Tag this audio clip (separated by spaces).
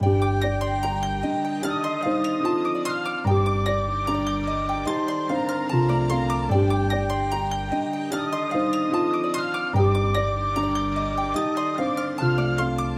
flute koto pluck